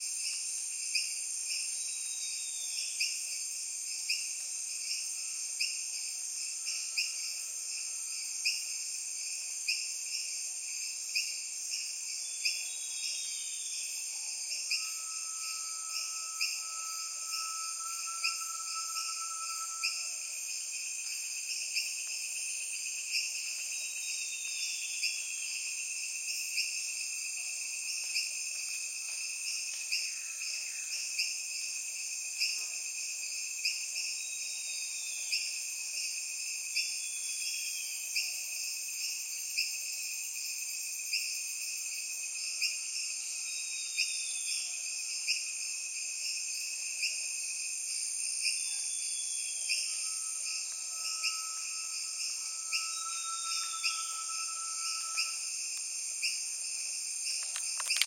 Afternoon recording of a upland forest in the Peruvian Amazon. Of note are lots of Adenomeera rain frogs, a tinamou, etc.
Check out my work on IG @ musingsofjoe